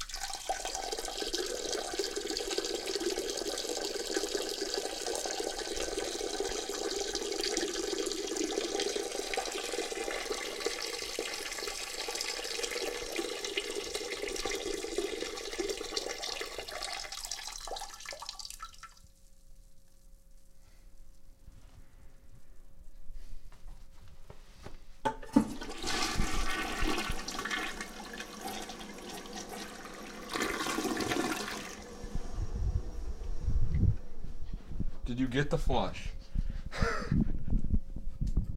Content warning
this is a short clip of me peeing